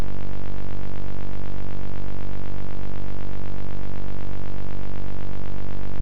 2 osc Bassy lead
Sample I using a Monotron.
Bass, Lead, Sample, Synth